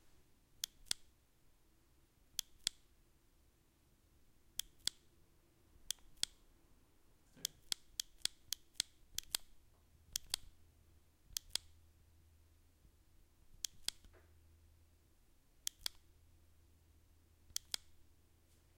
push button 02
Pushing on and off a different small plastic button. Recorded with AT4021s into a Modified Marantz PMD661.